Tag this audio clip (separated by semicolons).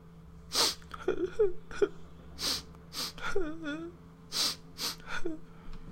sound; crying; Man